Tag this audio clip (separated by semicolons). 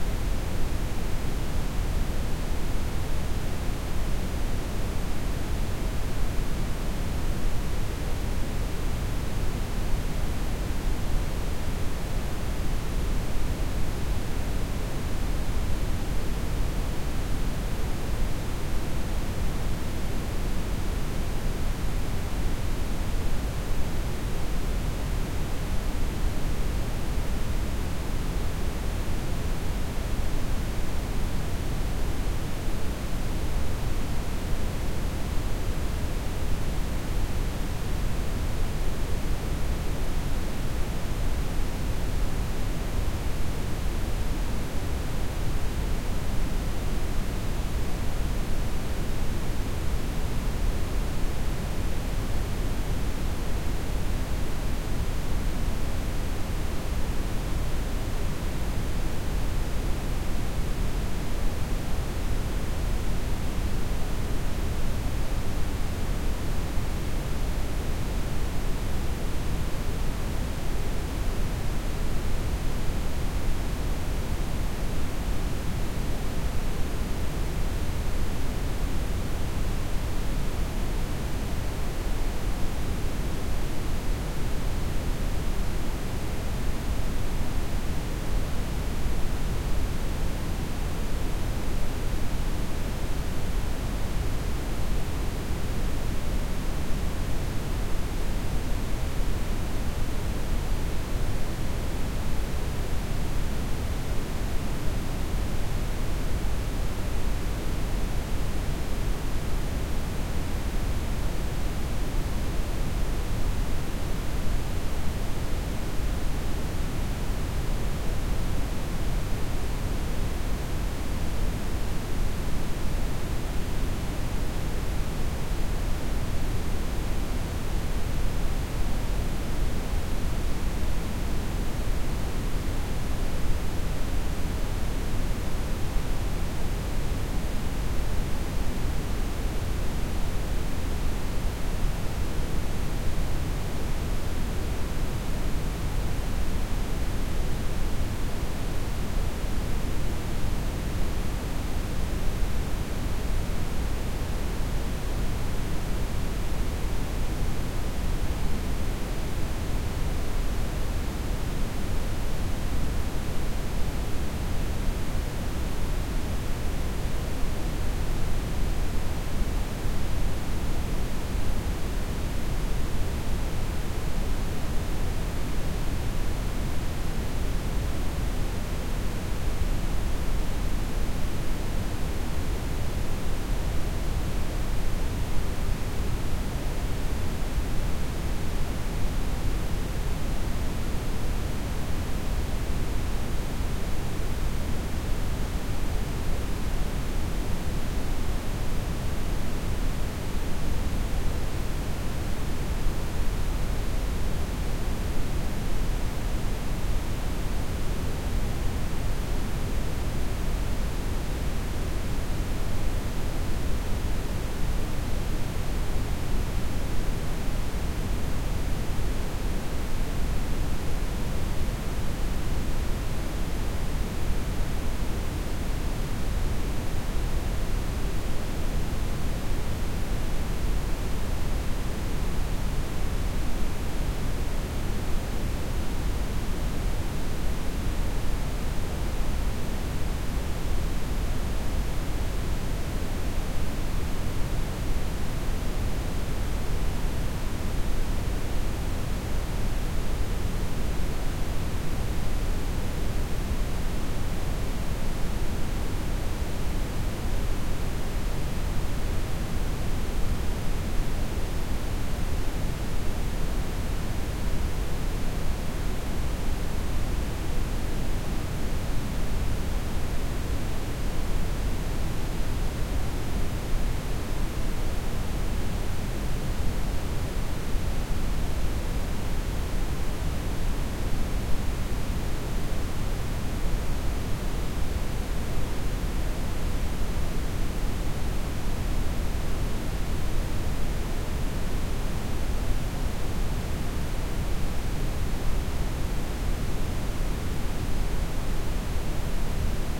velvet-noise; red-noise